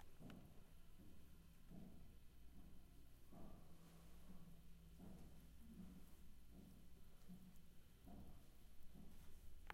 water kraan
home, house-recording